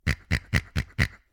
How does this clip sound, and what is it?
dog toy latex pig several times
The sound of a latex pig dog toy, compressed several times.
Recorded with the Fostex FR-2LE and a Rode NTG-3.
swine, pig, Fostex, latex, dog, hog, FR2-LE, many, various, Rode, dogtoy, repeatedly, few, pork, NTG3, times, several, toy, NTG-3